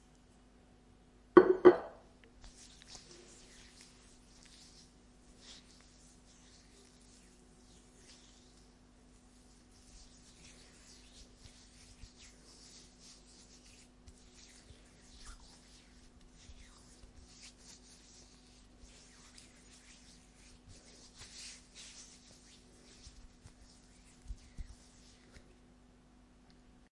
Greasing hands with olive oil
Greasing my arm then my hands with olive oil.
grease, hands, oil, olive